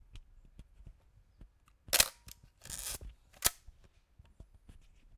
Shutter sound Chinon
Shutter sound from an old Chinon camera, also with the sound of the film rewind. Recorded with Zoom H1n.
Podcaster working with Swedish motorsports podcast Driftpodden